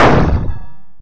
Techno/industrial drum sample, created with psindustrializer (physical modeling drum synth) in 2003.
drum, industrial, metal, percussion, synthetic